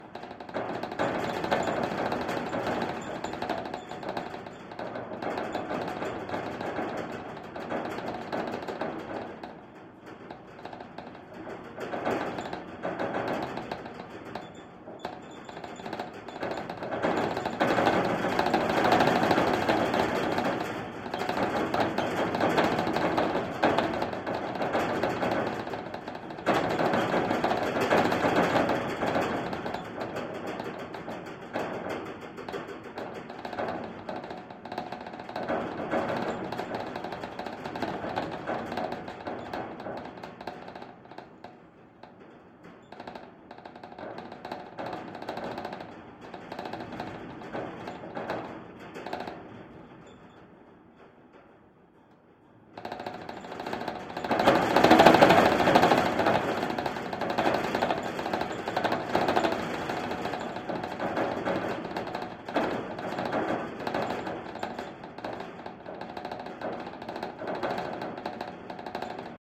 GGB 0412 Construction Sign N
Contact mic recording of a construction warning sign mounted to chain link fence on the Golden Gate Bridge in San Francisco, CA, USA near the northeast pedestrian approach, Marin County side. Recorded August 20, 2020 using a Tascam DR-100 Mk3 recorder with Schertler DYN-E-SET wired mic attached to the cable with putty. Normalized after session.
construction-sign, Golden-Gate-Bridge, contact-mic, contact-microphone, Schertler, Marin-County, San-Francisco, contact, field-recording, bridge, Tascam, mic, metal, wikiGong, DR-100-Mk3, DYN-E-SET, construction, steel, sign